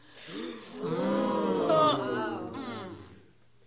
Gasp 3 with worry
Small crowd gasping with worried noises